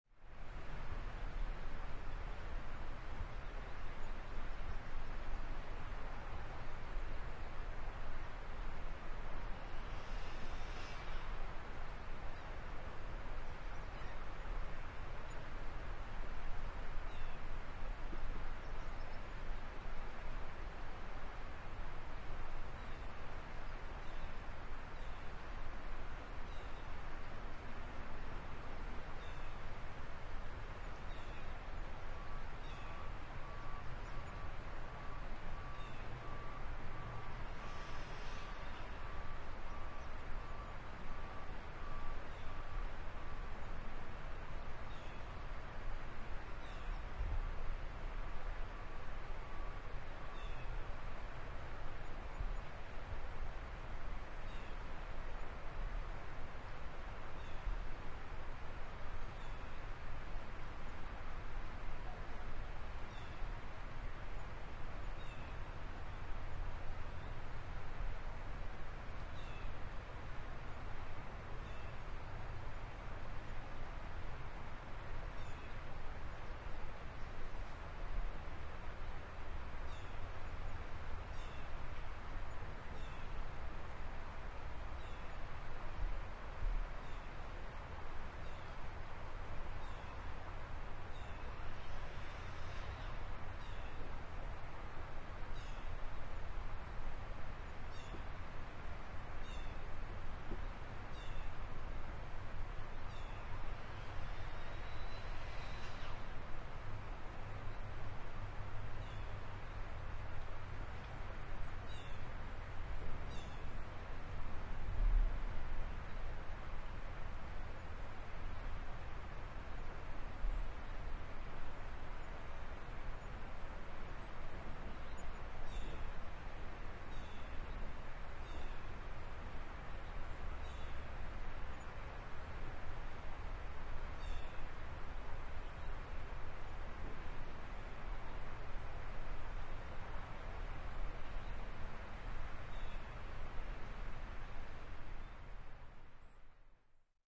TRURO SUMMER MORNING MS
August 2007 recording on hill beside the Salmon River in Truro, Nova Scotia. Summer morning predominantly with birds and river and distant small town activity - construction and trucks and light wind -occasional thumps in sound from wind -MS decoded This is an MS (Schoeps)non-decoded recording.